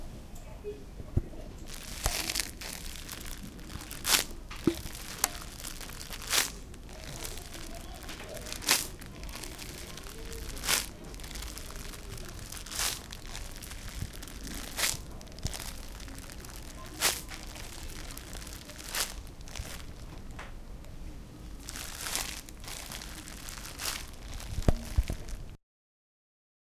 Pas sur feuilles mortes
Sound of steps on dead leaves recreated with a plastic bag.
dead-leaves, steps, walking